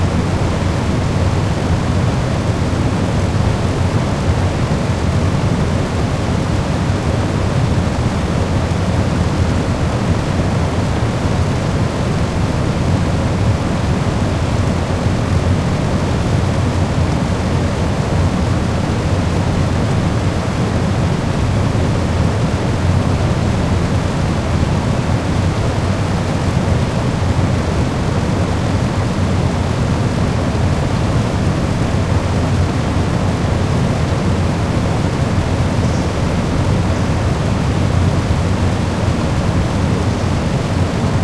fan helsinki socispihakaikk
A collection of fans, all in the same back yard. Among my other fan sounds you can find some more individually pointed recordings of these particular fans. Field recording from Helsinki, Finland.
Check the Geotag!
listen-to-helsinki, fan, city, ambience